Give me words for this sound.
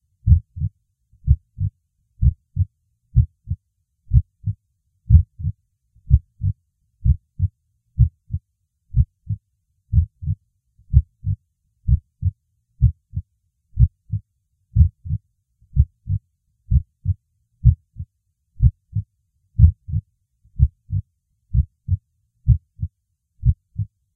A good, steady, and classic heart beat sound. You may need good bass speakers or earbuds to hear this.